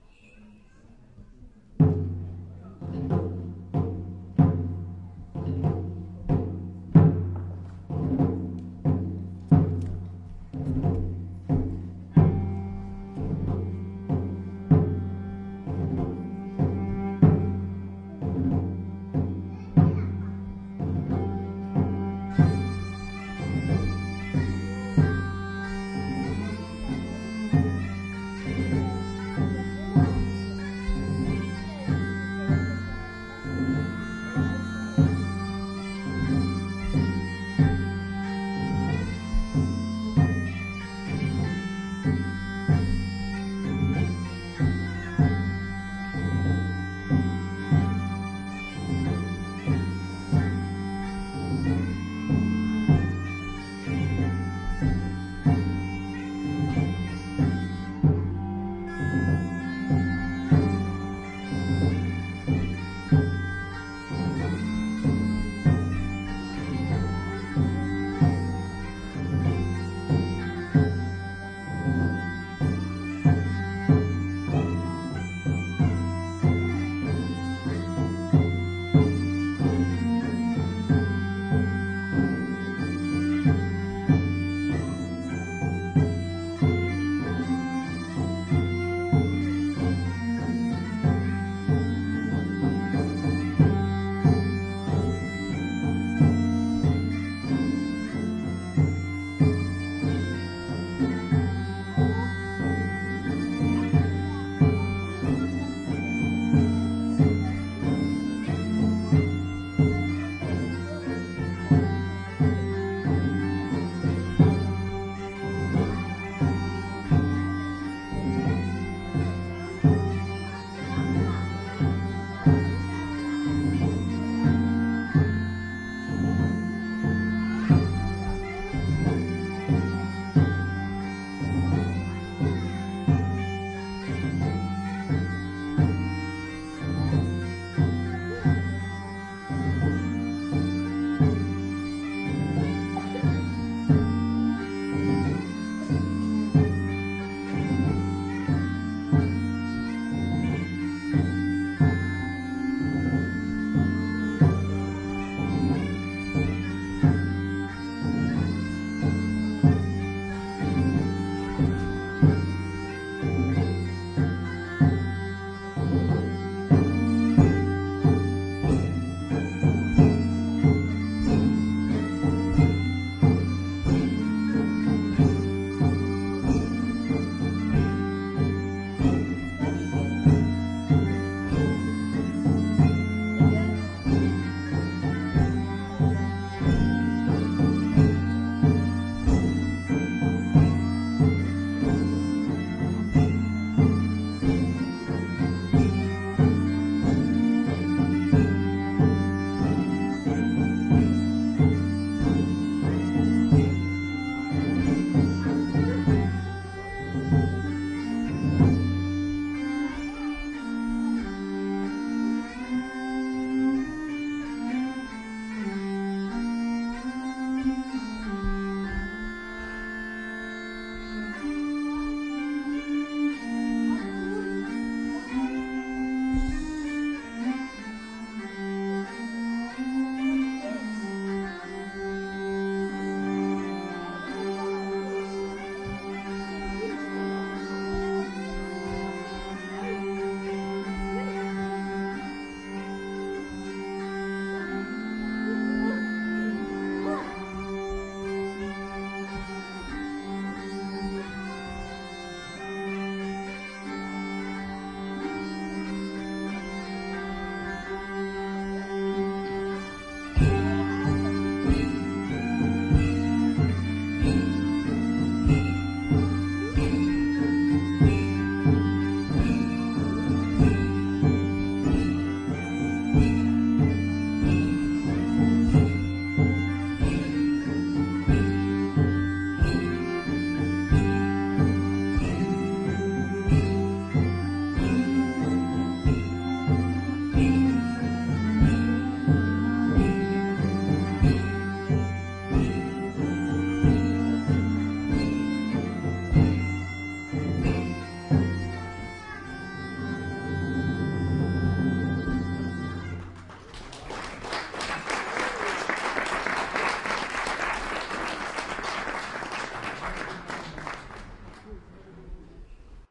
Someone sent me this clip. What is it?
"Stella Splendens" (Spain around 1400)
This was recorded a fine Saturday in august, at the local viking market in Bork, Denmark. Three musicians played a little concert inside the viking church. Unfortunately i have no setlist, so i can't name the music.
Recorded with an Olympic LS-100 portable recorder, with internal mics.
Please enjoy!